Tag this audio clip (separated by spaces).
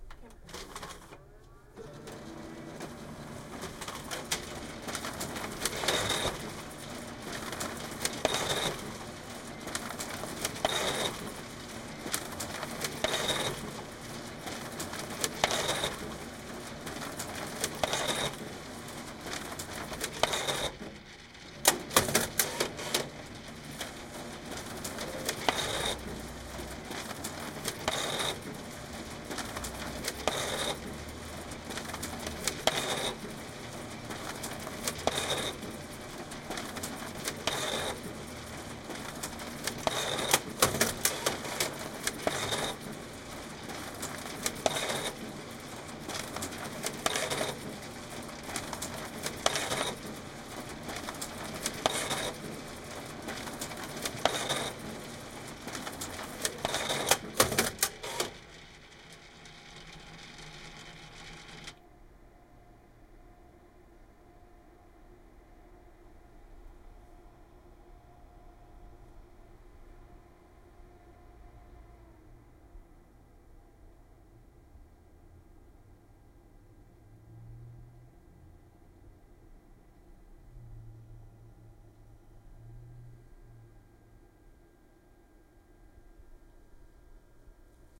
photocopier copies office